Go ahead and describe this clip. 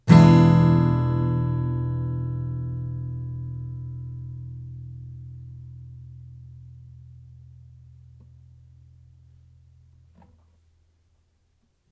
Yamaha acoustic through USB microphone to laptop. Chords strummed with a metal pick. File name indicates chord.
guitar, chord, strummed, acoustic